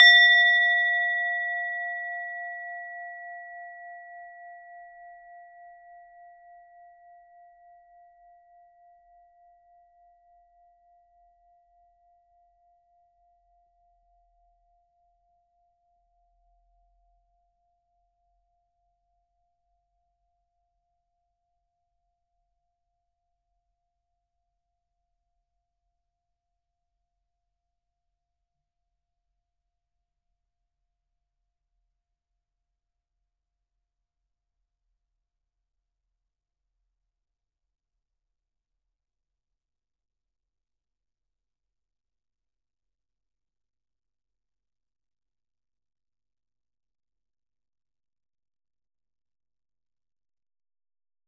Tibetan Singing Bowl 10 cm (Struck)
A 10 cm Tibetan singing bowl struck with a wooden beater. Recorded using Sennheiser 8020s.
meditation, percussion, Tibetan-singing-bowl, ethnic